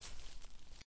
Left Grass/Grassy Footstep 1
A footstep (left foot) on a dry grassy surface. Originally recorded these for a University project, but thought they could be of some use to someone.
grass, feet, footstep, walking, grassy, steps, bracken, foot, left-foot, Dry-grass